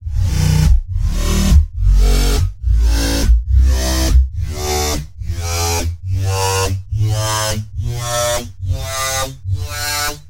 Short stabs on a scale c,d,e... to ..g,a,b. FM synthesis. The oscillators are fed back into themselves and each other and 40 voices unison added to create a ubiquitous growl/yoy sound. On large speakers, or a decent pair of buds, the sinewave osc fundmental can be heard as sub-bass.
Experimental, effect, dub-step, electronic